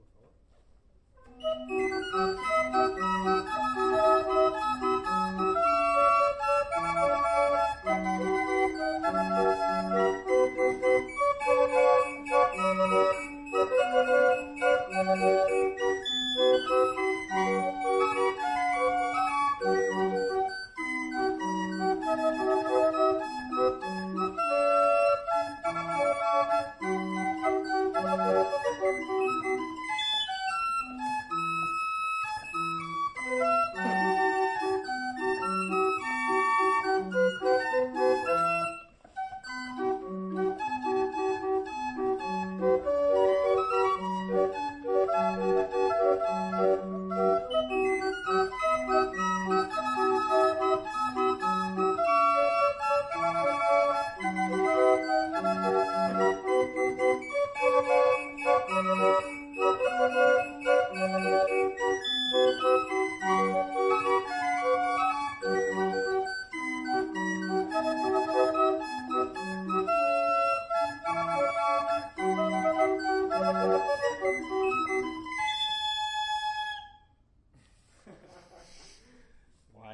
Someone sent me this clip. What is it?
Big, old musicbox
Old hand operated mechanical musicbox.